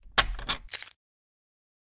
The sound of a gun being reloaded.